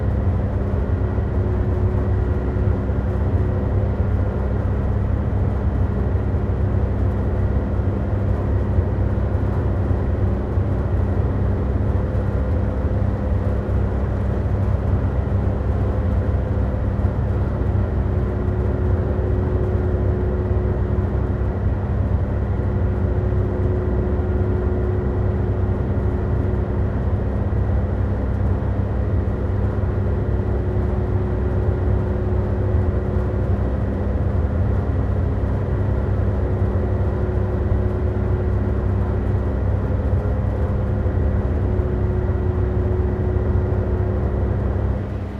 Fan Ventilation Mono 5
Recording made of ventillation i Lillehammer Norway